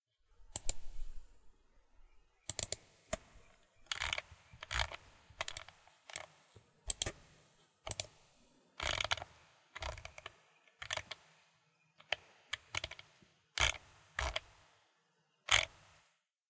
Mouse Clicks & Scrolls
Logitech 3-button mouse being clicked, double-clicked, and the scroll wheel is used. Recorded with Zoom h4n in stereo
clicks, zoom-h4n